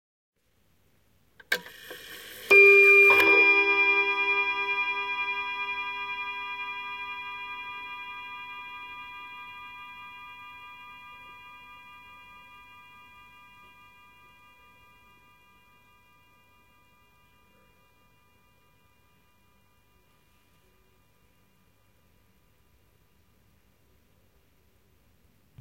Antique table clock (probably early 20th century) chiming one time.
time, antique, hour, pendulum, chimes, clock, o, one